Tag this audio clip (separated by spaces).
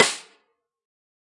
1-shot snare multisample drum velocity